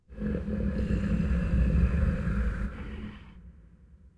Gruñido de Rata-Humano (Monster/Mutant). Zhile Videogame.